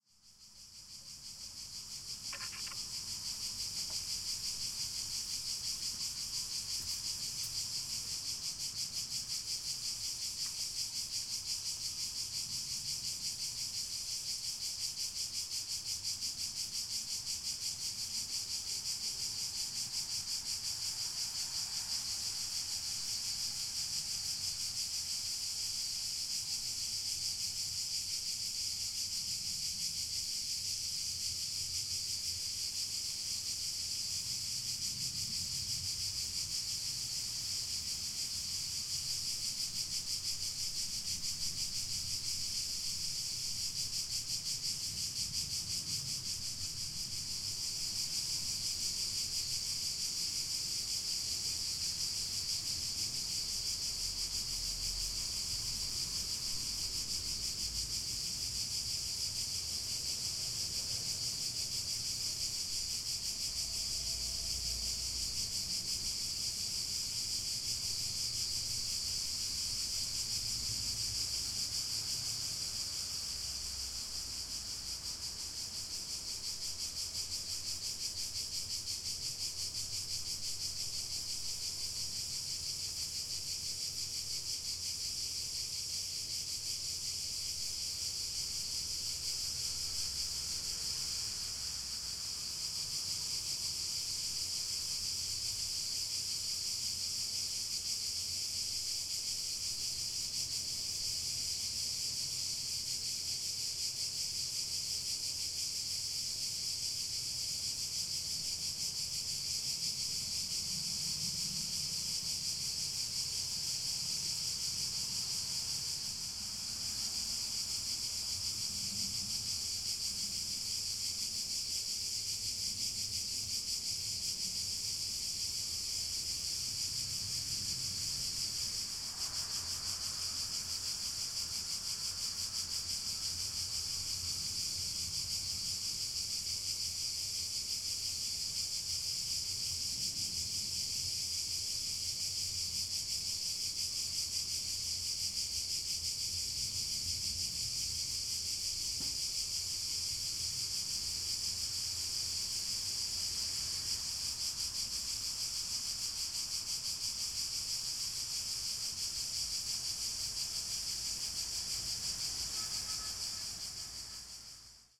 Field recording of cicadas in a summer afternoon.